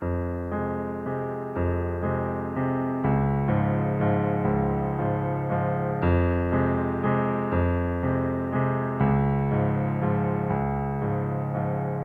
3/4 piano-loop in f-minor
dark piano-accomplisment with left hand, to replace bass or use as intro.
100bpm, 120bpm, background, cinematic, dark, loops, piano, piano-bass, Yamaha-clavinova